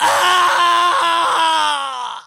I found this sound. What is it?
Scream Male 00
A male scream of panic and pain sound to be used in horror games. Useful for setting the evil mood, or for when human characters are dying.
sfx, fear, scream, epic, indiedev, indiegamedev, games, gamedeveloping, video-game, game, frightful, gamedev, male, videogames, terrifying, scary, frightening, gaming, horror, rpg, fantasy